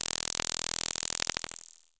Basic impulse wave 2 E1
This sample is part of the "Basic impulse wave 2" sample pack. It is a
multisample to import into your favourite sampler. It is a basic
impulse waveform with some strange aliasing effects in the higher
frequencies. There is a high pass filter on the sound, so there is not
much low frequency content. In the sample pack there are 16 samples
evenly spread across 5 octaves (C1 till C6). The note in the sample
name (C, E or G#) does indicate the pitch of the sound. The sound was
created with a Theremin emulation ensemble from the user library of Reaktor. After that normalising and fades were applied within Cubase SX.
basic-waveform, impulse, multisample, reaktor